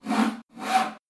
A one-shot of a saw going in and out of wood.
DIY, One-shot, saw